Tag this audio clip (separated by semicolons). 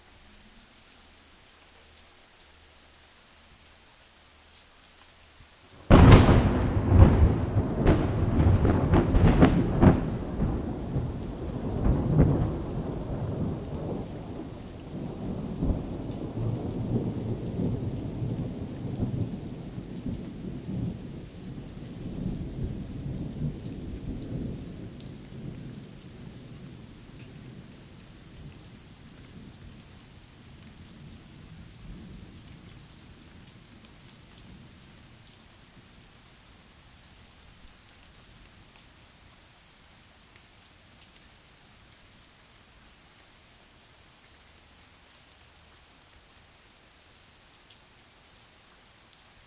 lightning; field-recording; storm; weather; nature; thunder; thunderstorm; thunder-storm